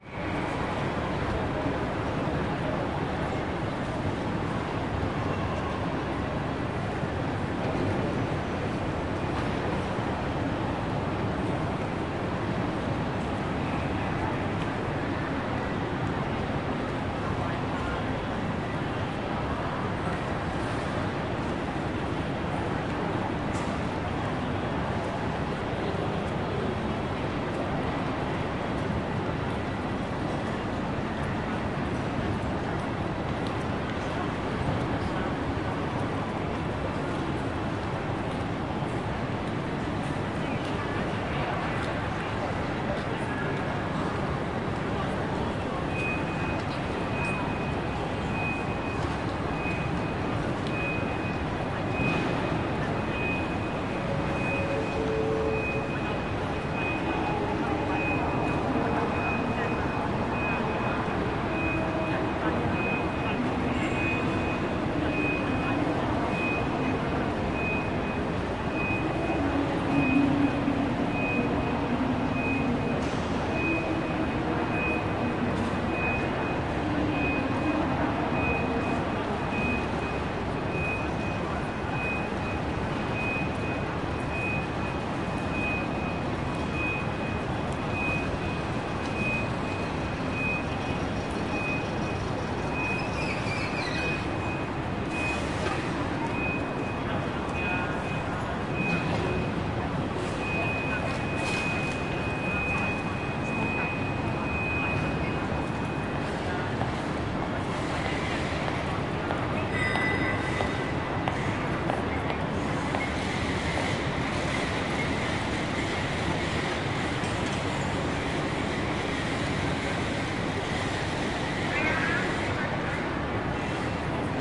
Bangkok Airport
Airport Bangkok Field recording Recorded with a Zoom H4n.
For more high quality sound effects and/or field-recordings, please contact us.
fieldrecording, field-recording, sfx, background, beeps, people, ambiance, places, ambient, thailand, ambience, atmosphere